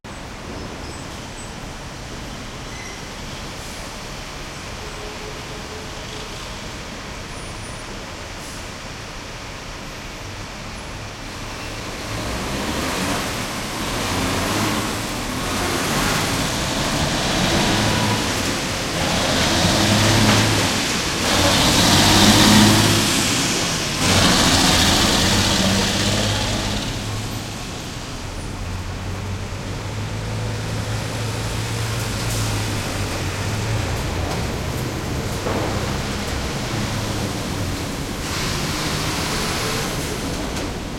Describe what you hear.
traffic heavy under Brooklyn bridge
heavy, bridge, Brooklyn, traffic, under